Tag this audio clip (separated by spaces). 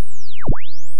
clothoid euler function spiral synthesis